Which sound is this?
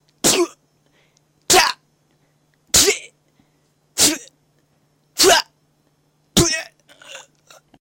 Hit Cries 1
hit, gasp, cries, yell, blood